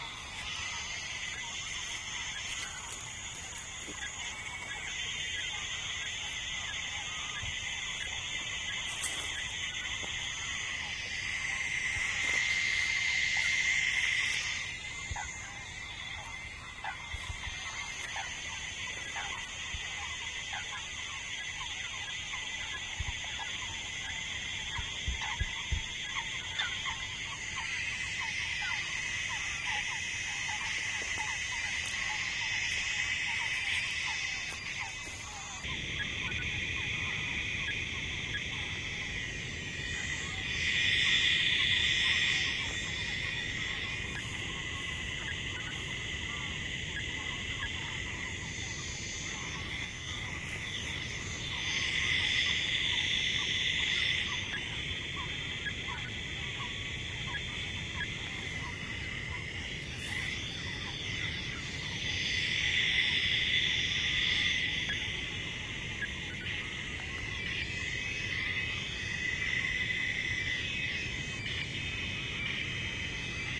static noise from a radio device, of the kind used to track animals in the wild, plus some bird calls and movements in background. Sennheiser MKH60 + MKH30 into Shure FP24 preamp, Olympus LS10 recorder. Decode to mid/side stereo with free Voxengo plugin